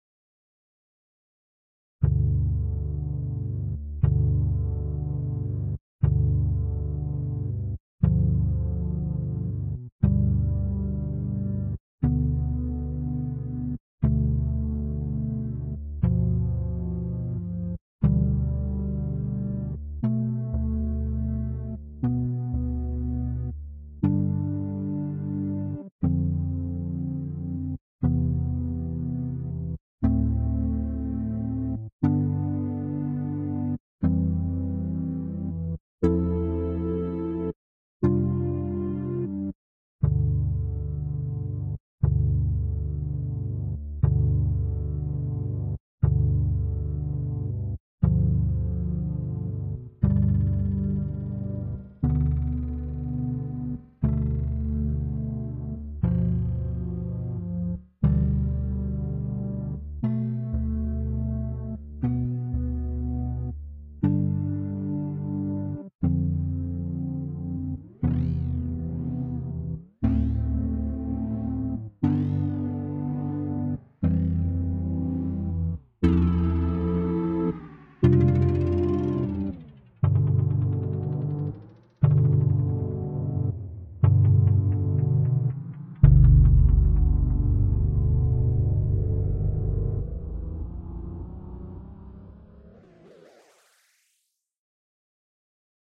Sequence with harmonic progression using Calf Organ and Ardour. Automation on fx towards end including Calf Phaser and MDA DubDelay.
syn, electronic, melody, fx